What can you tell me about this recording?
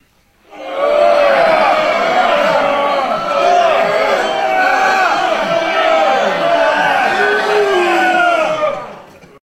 Crowd Moaning
Recorded with Sony HXR-MC50U Camcorder with an audience of about 40.